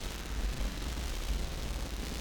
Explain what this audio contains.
Rocket Fire Loop
A loop-able sound effect that sounds like a missile in mid-transit or the sound of an alien UFO ship landing.